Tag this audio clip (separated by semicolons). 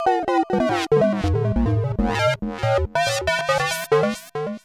bass; kat; leftfield; electro; chords; acid; synth; idm; glitch; thumb; alesis; micron; beats; base; ambient